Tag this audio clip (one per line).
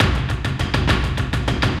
taiko-loop
taiko
drum-loop